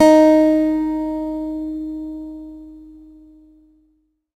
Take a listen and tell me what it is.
Sampling of my electro acoustic guitar Sherwood SH887 three octaves and five velocity levels
multisample, guitar